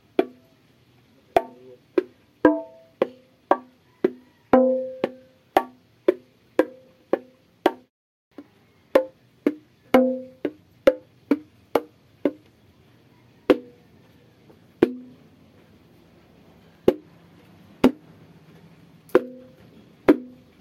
Individual hits and slowly played rhythms on bongo drums. Recorded at home on a smartphone - that should explain the background noise.
bongo, bongos, drum, drums, latin, martillo, percussion